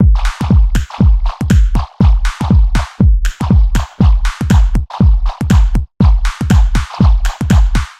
A noisy techno loop with claps and finger snaps and funky kicks added.